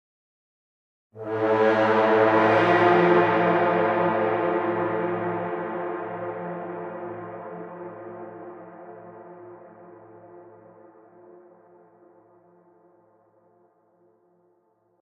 epic brass